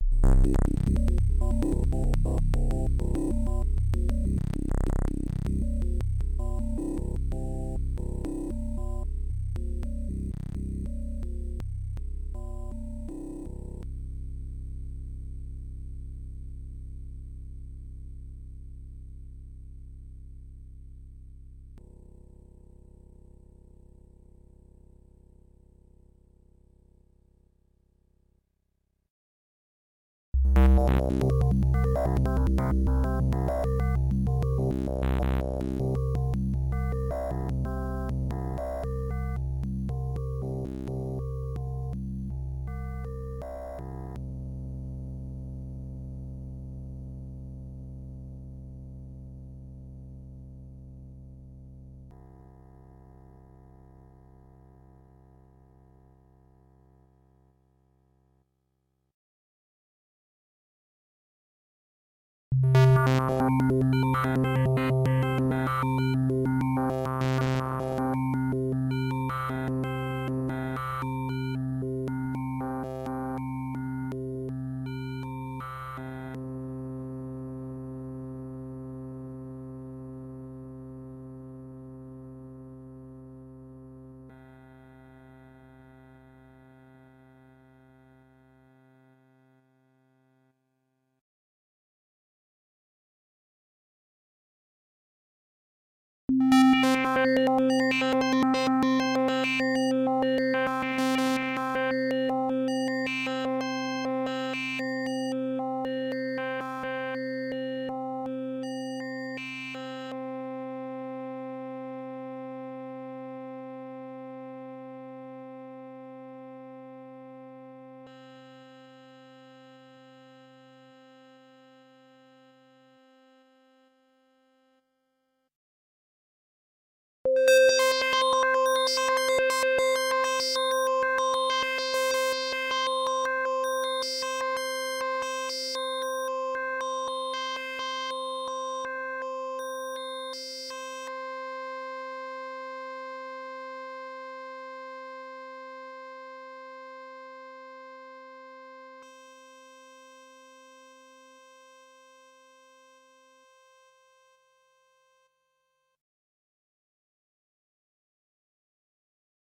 EVOLUTION EVS-1 PATCH 082
Preset sound from the Evolution EVS-1 synthesizer, a peculiar and rather unique instrument which employed both FM and subtractive synthesis. This sample and hold sound is a multisample at different octaves.
evolution, evs-1, patch, preset, random, sample-and-hold, synth, synthesizer